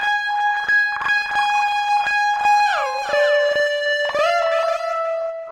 paul t high lead168 bpm halftime
high guitar lead
guitar, lead